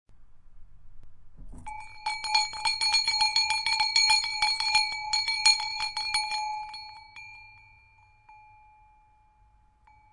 Bell, ringing, ring
ring, Bell, ringing